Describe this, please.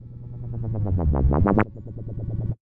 sulfurbassp.1wubbass
bass sequence made with fruity loops